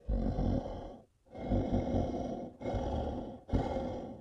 A dying dragon or monster
dragon, or, dying, creature, monster